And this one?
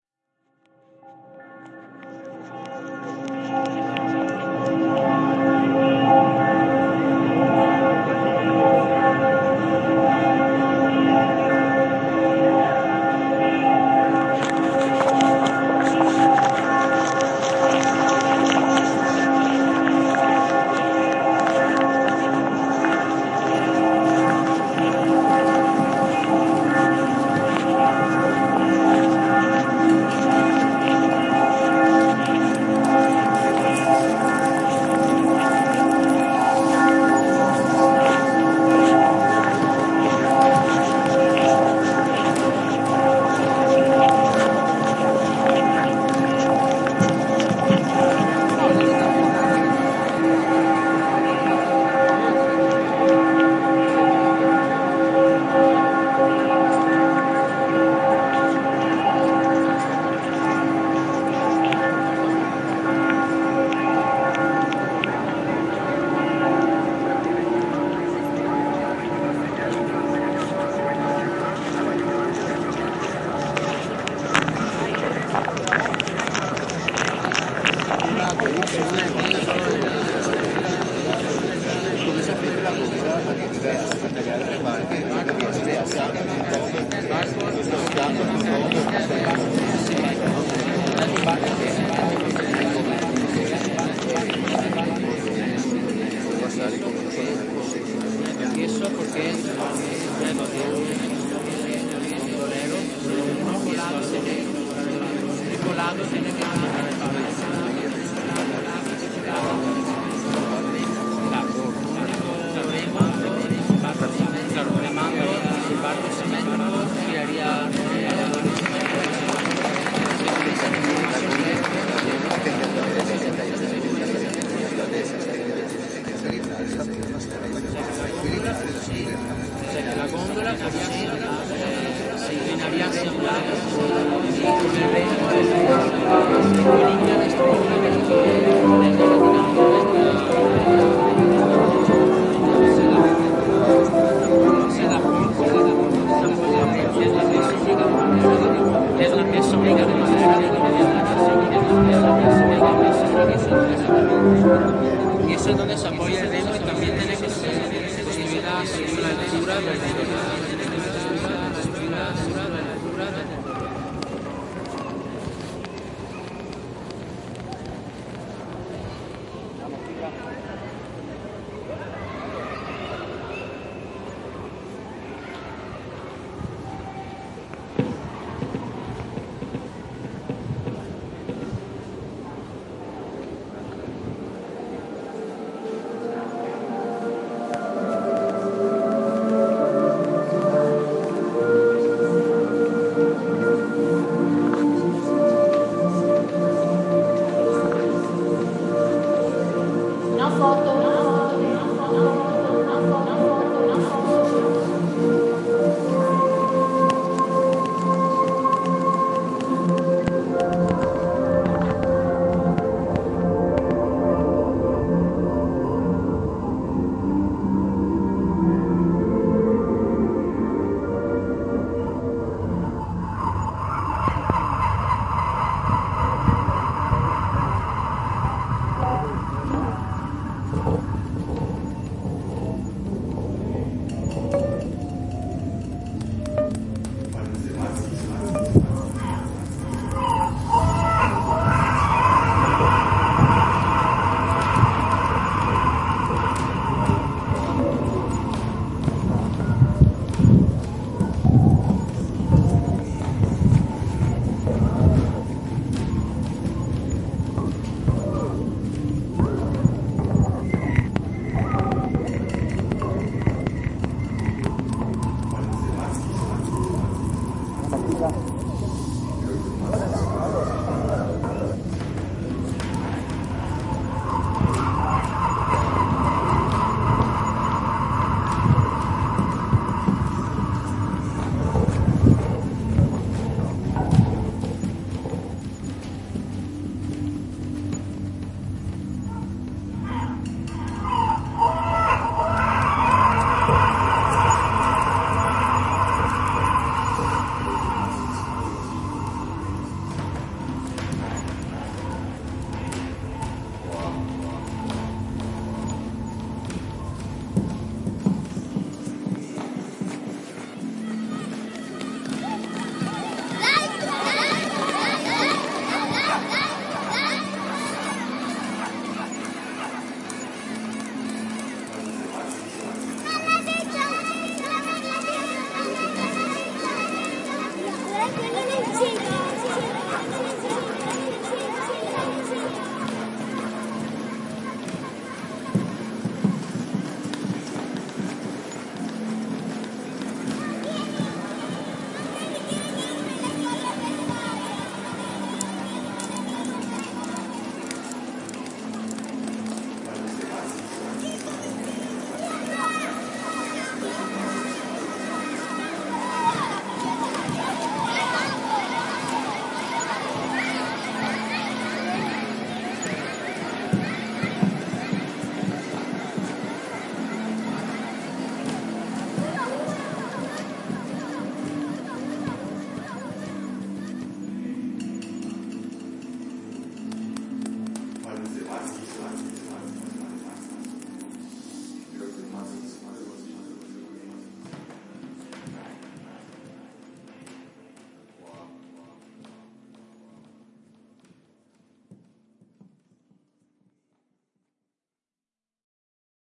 Field recording, Venetian soundscape.
Mixing and editing by Roberto Cuervo
Recording by students of architecture and design from International Study Program PEI from Pontificia Universidad Javeriana-Colombia.
June 2016
ambience, bells, city, field-recording, people, soundscape, urban, venecia, venezia